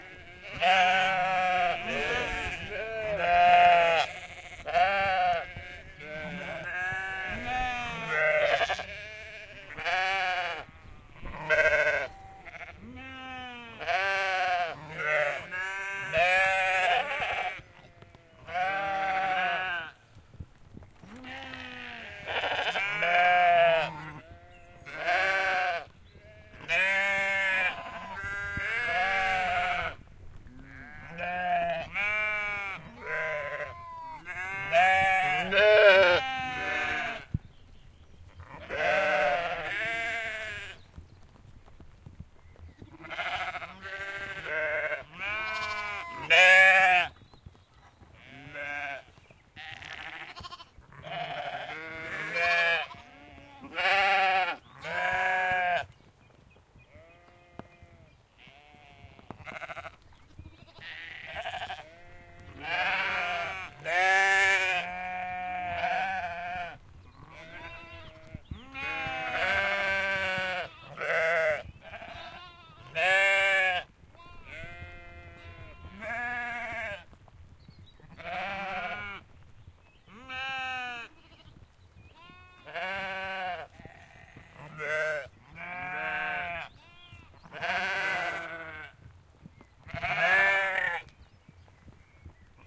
Every evening on our stay at this sheep farm, the sheep and their lambs would gather by the fence near to us. It was raining heavily and there was some wind. Recorded on a H4N Pro.